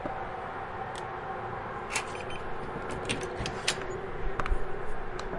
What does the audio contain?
Hotel Door Opening
Stereo
Hotel Electronic door opening
Zoom H4N built in microphone.
closing, door, Hotel, open